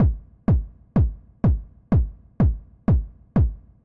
Kick house loop 125bpm-03
125bpm, kick, loop